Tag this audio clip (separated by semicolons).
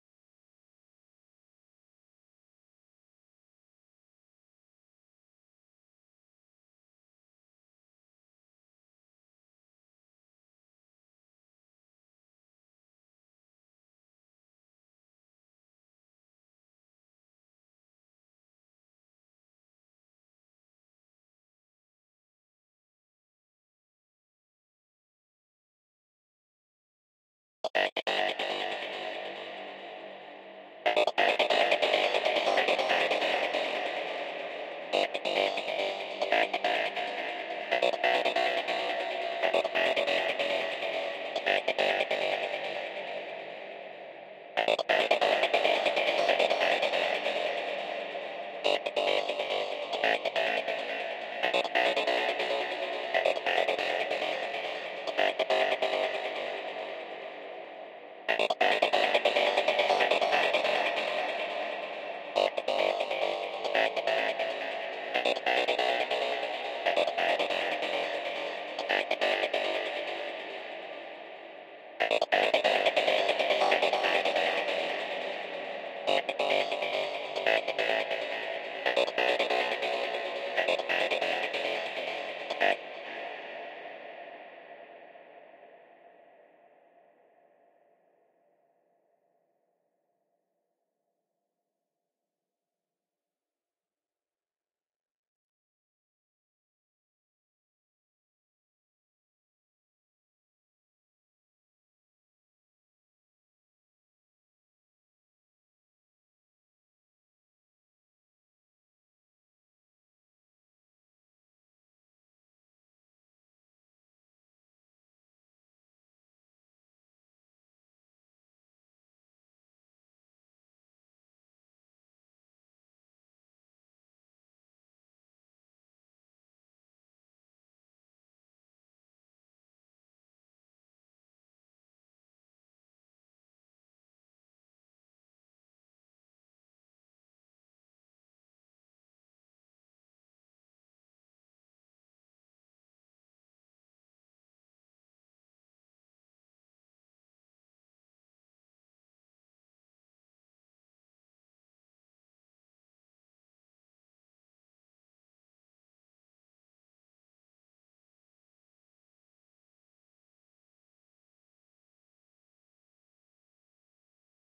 Psytrance; Serum; Stem